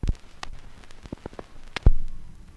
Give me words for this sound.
Short clicks, pops, and surface hiss all recorded from the same LP record.